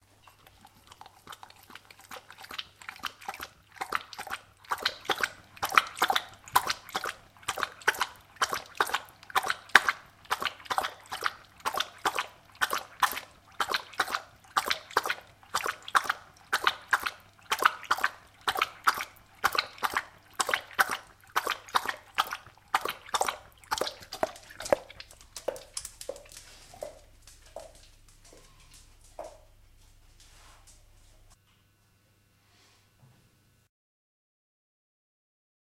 Dog - Drinking
A dog drinking some water in his bowl - interior recording - Mono.
Recorded in 2002
drinking, dog, drink